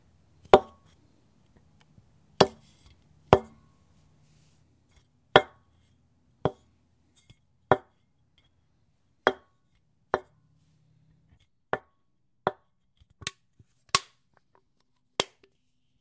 Sounds of setting an empty soda can on wood